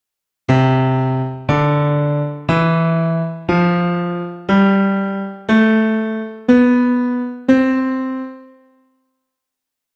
C3 Major Scale Piano
C 3 Major Scale on Piano @ 60 BPM